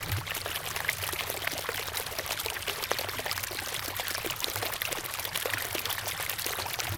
Babbling Brook
Recording of a small stream in a stereo xy pattern
babbling, brook, creak, h2o, liquid, pond, running, stream, water